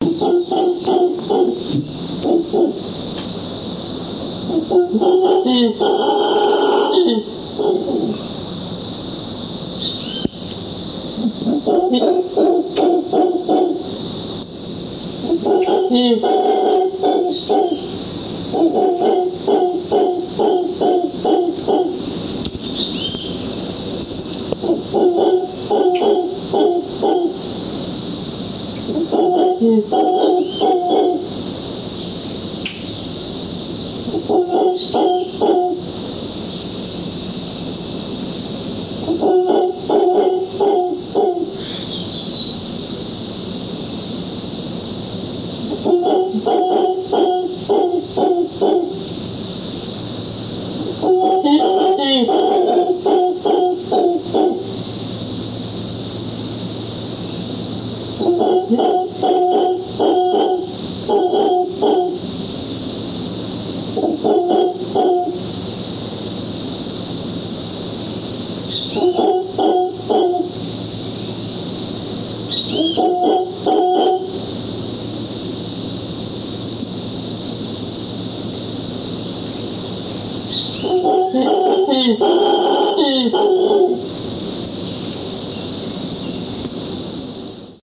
Feb 2 2016 Howler Monkey Yucatan Mexico
Recorded audio of a Howler Monkey in the Yucatan Peninsula in Mexico. As their name suggests, vocal communication forms an important part of their social behavior. They each have an enlarged basihyal or hyoid bone which helps them make their loud vocalizations. Group males like the one you hear in this recording generally call at dawn and dusk, as well as interspersed times throughout the day. I recorded this one at dusk. The main vocals consist of loud, deep guttural growls or "howls". Howler monkeys are widely considered to be the loudest land animal. According to Guinness Book of World Records, their vocalizations can be heard clearly for 3 mi (4.8 km). The function of howling is thought to relate to intergroup spacing and territory protection, as well as possibly to mate-guarding.
Howler, Mexico